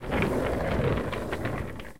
Wheel Push - Machine Road Wood Surface UI
push, wood, wheels, surface, mechanical, move, chair, office